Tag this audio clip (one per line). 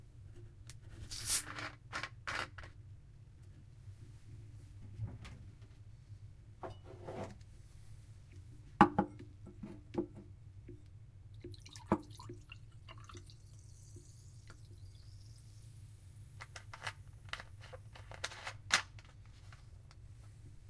opening
drink
soda
glass
bottle
Fizzy